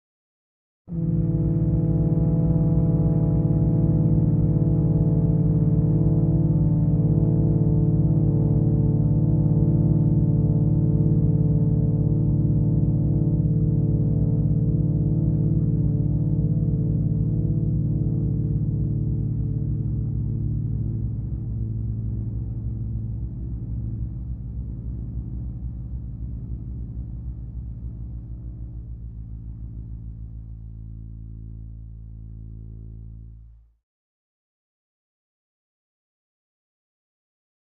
horns combined droppitch
I accidentally combined all the samples from this pack
and played them two octaves lower, the result was to my liking.
chord, cluster, dirty, drone, experimental, pad, soundscape, space, spooky, trumpet